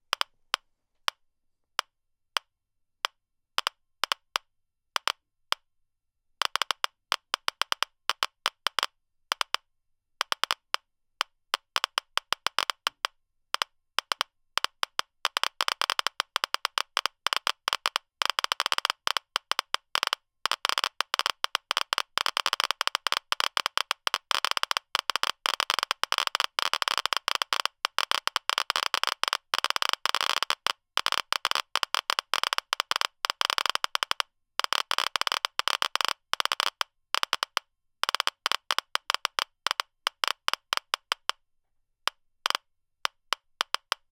Geiger Counter Hotspot (Long)
Long and slow sweeping over a hotspot area.
This is a recording of an EBERLINE E-120 Geiger counter, which makes the "classic" Geiger click sound. Recorded with a RØDE NT-1 at about 4 CM (1.6") from the speaker.
Click here to check out the full Geiger sound pack.
FULL GEIGER
DIAL
MIC TO SPEAKER
click, clicks, counter, geiger, geiger-counter, hotspot, radiation, sweeping